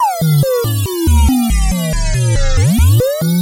Game Bleeps 5
A sound that might work well as a "power-up" or "power-down" noise in a game or, alternatively, as an obscure effect in a piece of dark, bassy music set at 140bpm. This was synthesised in NI Massive by me.
140, 140bpm, bass, bleep, massive, powerup